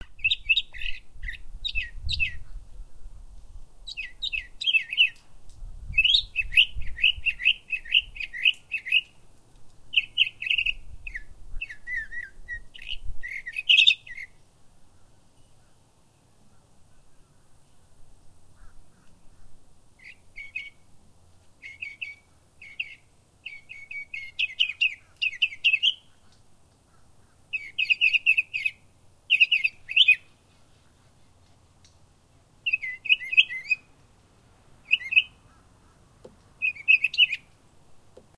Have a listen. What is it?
Florida Mockingbird in my backyard
Just a quick recording before class today.
If you listen closely enough, there's some crows in the BG too.
Ambiance sound is the sound of a busy divided highway about 500 meters away. I processed this sound in Sony Sound Forge a bit to remove some of it.
Just beware, the sound file contains a slight camera double beep at 9 and 15 seconds, although for student film purposes I don't see any penalty for it. There's also some slight wind noise in the beginning since my clean foot sock didn't cover all of it, but same mind set as above, won't be too big a deal.
Here's a video
mockingbird, birds, bird, outdoor, sing, neighborhood, urban, florida-bird, florida-birds, song, mocking, nature, florida